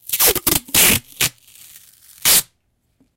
White opaque masking tape being surmonned to stick things together.
Recorded with ZoomH2n,XY mode.
duct, maskingtape, packing, rip, sticky, stretch, tape, tear